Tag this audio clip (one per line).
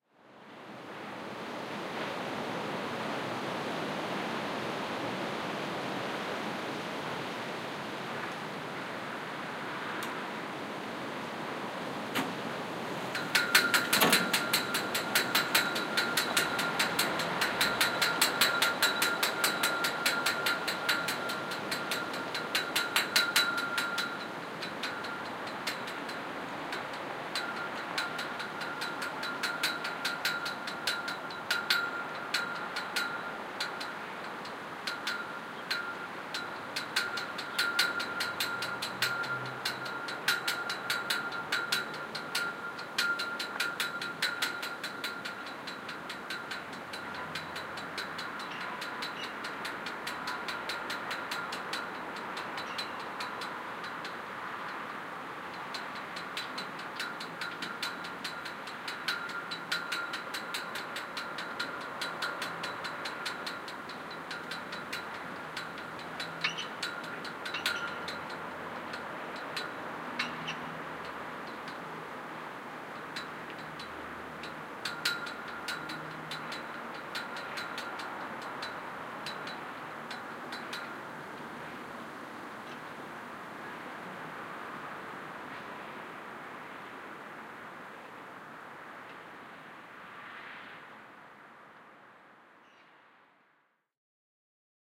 ambience
flagpole